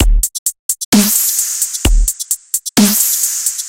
Dubstep Break
This is a beat I made using a custom bassdrum synth and then taking one of my snares and super saturating it but avoiding clipping. The idea was a brick of 200hz at 0db that start from sine and goes all the way to square in an attempt to kind of "cheat" dynamics. This sounds very dull alone but very punchy with some bass and synths going on. Give it a try!
break, sample